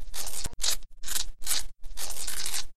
sparks, used for a damaged robot in our game.
electric, game, sparks, video